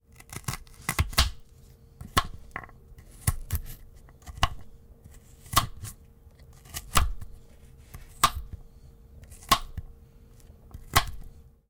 Cutting-carrot dull-knife
Cutting of a carrot on a cutting board with a dull knife
board, carrot, cutting, dull, knife, vegetable